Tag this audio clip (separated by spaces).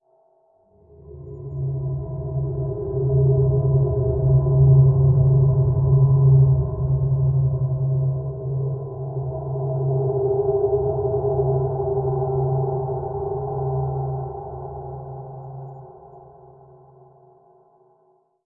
artificial drone multisample pad soundscape space